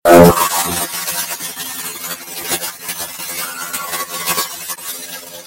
sound generated from an image
generated, image, b, cam, web